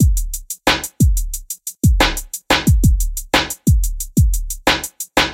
beat7 90BPM
stabs, dance, radio, jingle, move, interlude, instrumental, trailer, pbm, drop, part, club, background, beat, sample, music, pattern, chord, loop, mix, dancing, broadcast, disco, stereo, sound, intro, podcast, rap, hip-hop